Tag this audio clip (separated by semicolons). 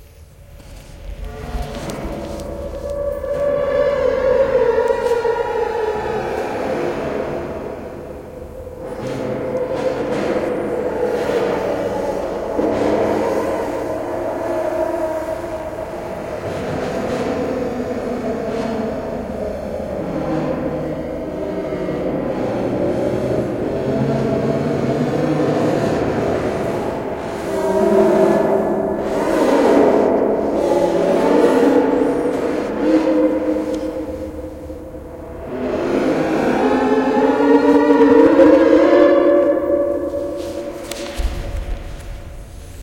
creepy; eerie; ghost; haunted; phantom; reverb; scary; sinister; spectre; spooky; squeak; squeal